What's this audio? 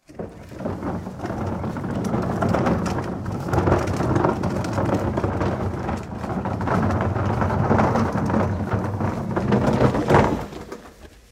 Recycle Bin Roll Stop Plastic Wheel Cement 2
roll, recycle-bin